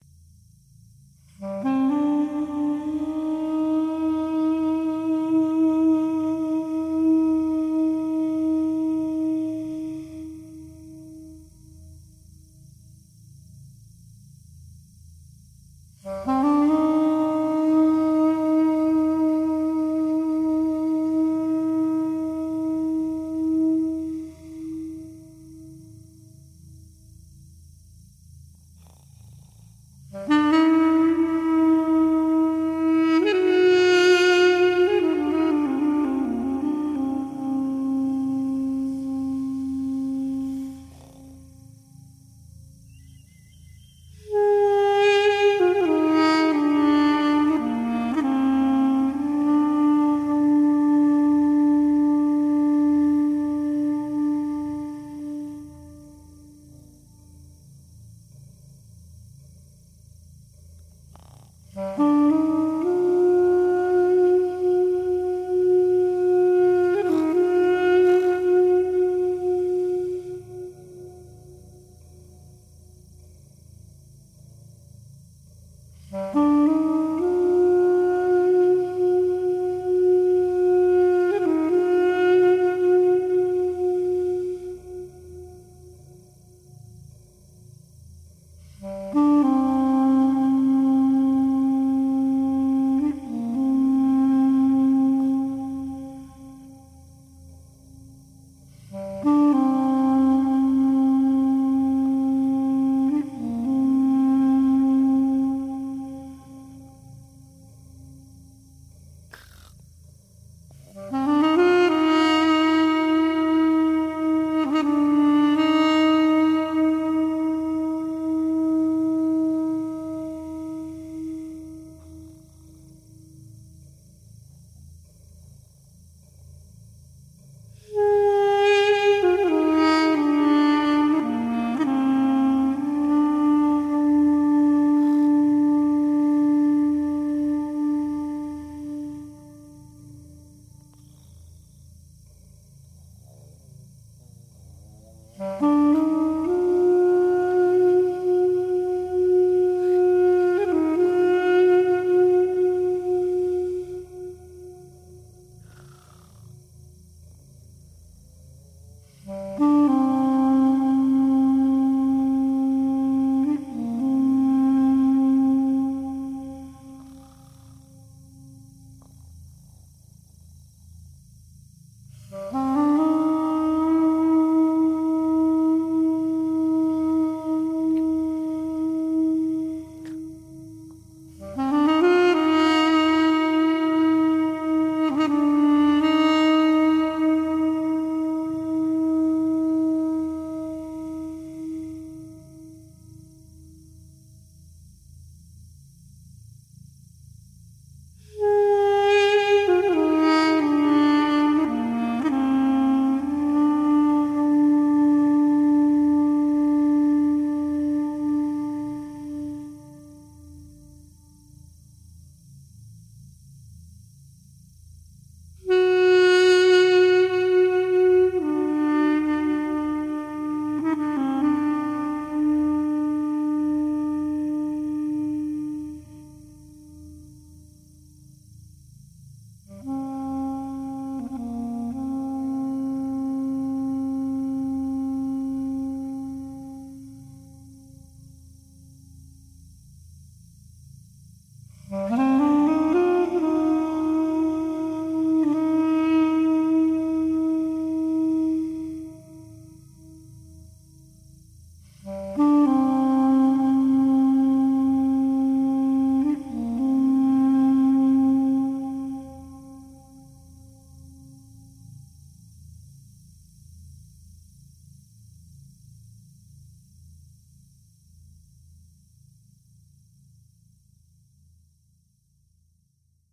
Duduk Armenian Sample Sound
woodwind, duduk, double-reed, aerophone